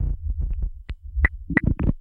YP 120bpm Plague Beat A10
Add spice to your grooves with some dirty, rhythmic, data noise. 1 bar of 4 beats - recorded dry, for you to add your own delay and other effects.
No. 10 in a set of 12.
120-bpm, 120bpm, dance, digital, drum, loop, minimal, minimalist, noise, percs, rhythm, rhythmic, urban